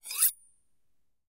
knife sharpen 01
Sharpening a knife.